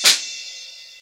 Snare Drum sample with 2012-MacbookPro
Snare Drum sample, recorded with a 2012 MacbookPro. Note that some of the samples are time shifted or contains the tail of a cymbal event.